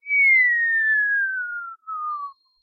Falling from a height.
effect, fail, game, whistle